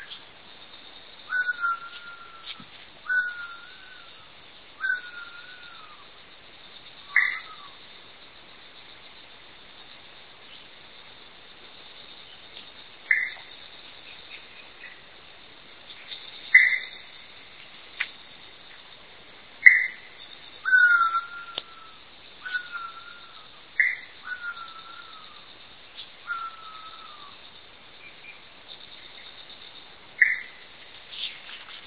Unidentified night bird call
This bird call was uttered from 9 pm to 11 pm and again from 3 am to 4 am in mid September in a forest in Wynaad. The four calls are repeated over and over again. Suspect Spot-bellied Eagle-owl.
bird, field-recording, India, night